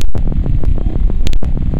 Crunch1LP
noisey 1-bar rhythmic loop made in Native Instruments Reaktor
noise, electronic, 1-bar, loop, dark, noisy, industrial, rhythmic